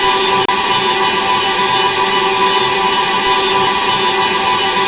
Created using spectral freezing max patch. Some may have pops and clicks or audible looping but shouldn't be hard to fix.
Still, Sound-Effect, Atmospheric, Freeze, Soundscape, Perpetual, Background, Everlasting